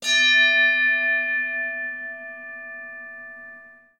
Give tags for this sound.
Caida; Golpe; knock

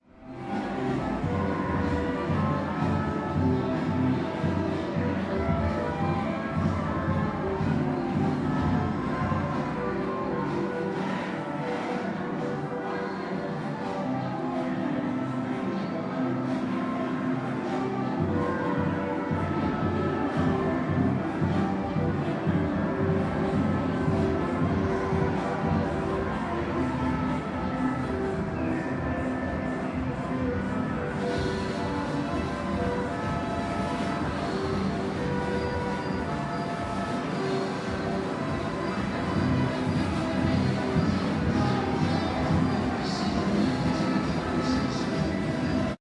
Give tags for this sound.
Indoor; Ambiance

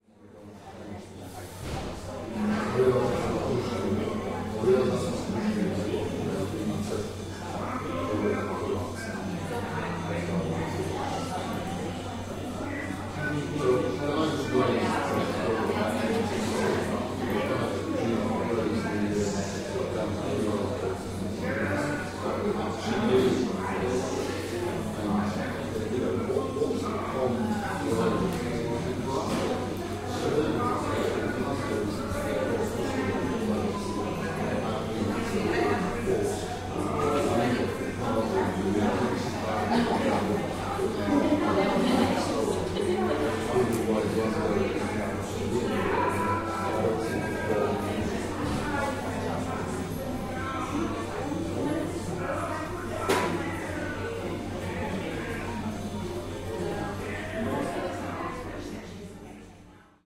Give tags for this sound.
ambience; coffee; shop

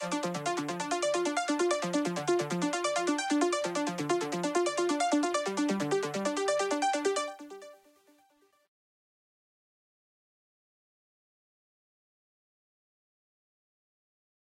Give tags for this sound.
synth; tr; trance; electronic; loop; arp

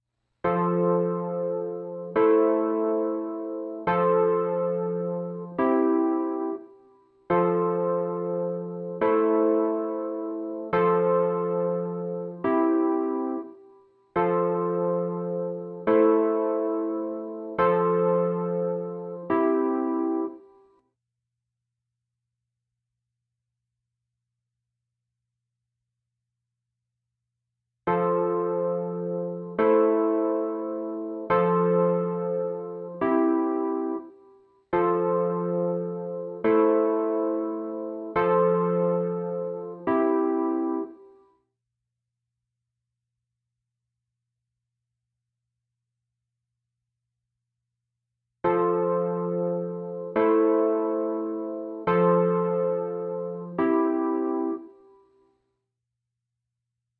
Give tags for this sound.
140; Beat; BPM; C; Chorus; Harmony; Hip; Hop; Keys; Snickerdoodle

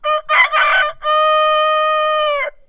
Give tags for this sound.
chicken; cock-a-doodle-doo; crow; crowing; rooster

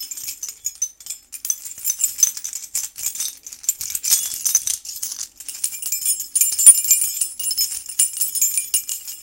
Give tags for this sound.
jingling; keys

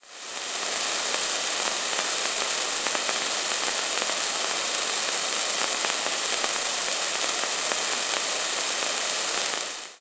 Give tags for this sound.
shower; weather; synthesizer; alesis; rain; micron; rainfall; synthetic; atmosphere

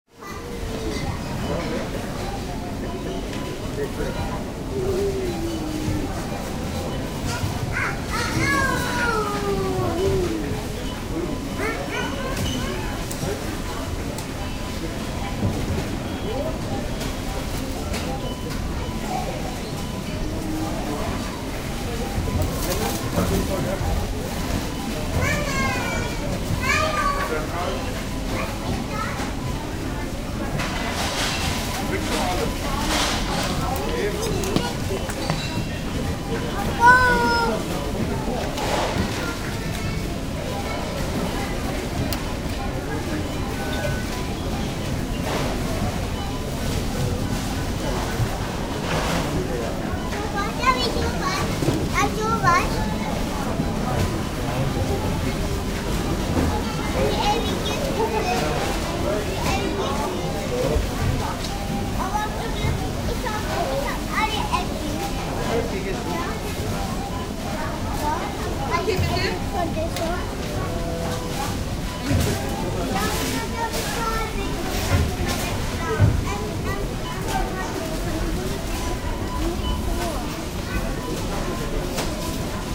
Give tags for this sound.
grocery; hypermarche; registers; shopping

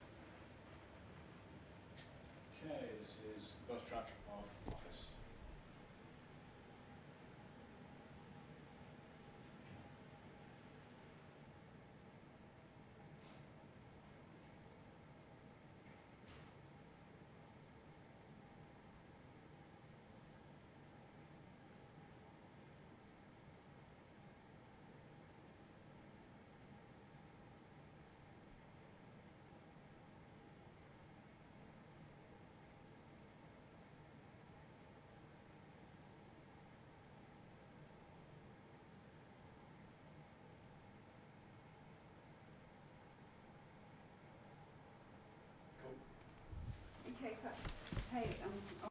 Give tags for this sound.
room-tone; room-noise; large-room; office

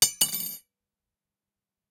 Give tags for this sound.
dishes; Falling; Hard; hits; knive

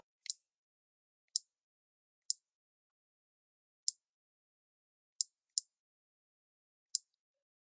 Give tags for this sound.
air; button; click; control-panel; electric; fixture; mechanical; switch

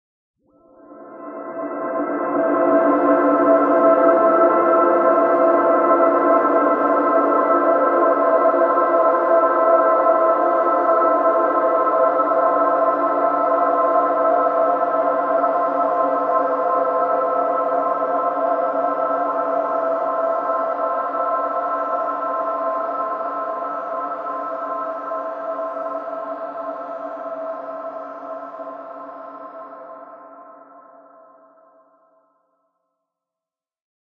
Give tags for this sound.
choir; chord; foggy; pad

